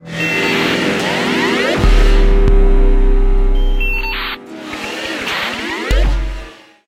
Futuristic Threat
Strings, futuristic sound effects. Haunting, threatening, spooky.